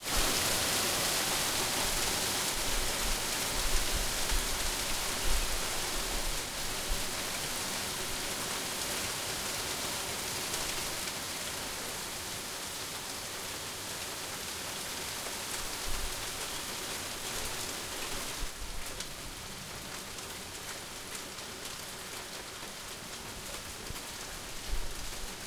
Heavy Rain UK Cambridge
Recording of really heavy rain.
Equipment used: Zoom H4 recorder, internal mics
Location: Cambridge, UK
Date: 16/07/15
Heavy-rain, rain, rainfall, raining, shower, weather